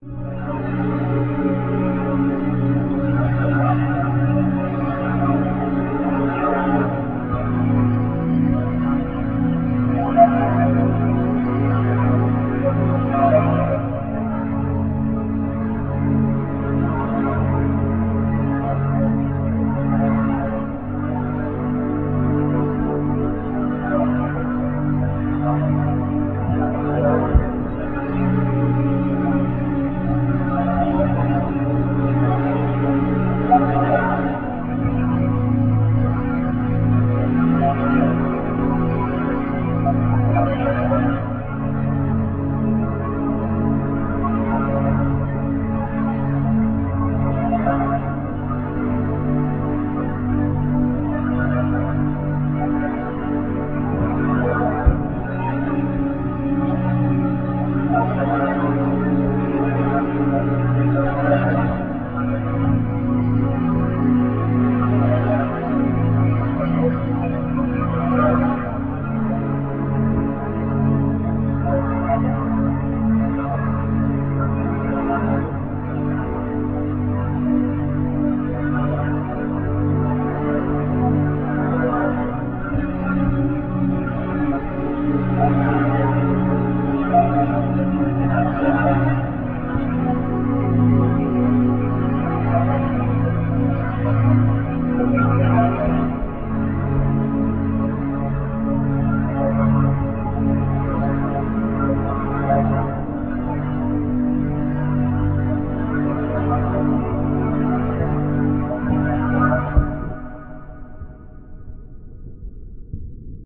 Blade Runner Ambient
Blade Runner Inspired Pack.
Futuristic - Space Vibes - Melancholic
[1] This loop was Made from scratch In Fl studio.
[3] Comment for more sounds like this!
Make sure to credit and send me if you end up using this in a project :)!
Alien, ambient, apocalyptic, blade, dreamscape, Drone, Electronic, Flying, future, Futuristic, Hum, lofi, Machine, Monotron, post, Robot, runner, Sci-Fi, Space